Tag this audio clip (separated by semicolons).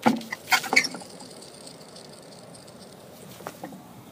dizzy; fun; playground; spin; spinny; weird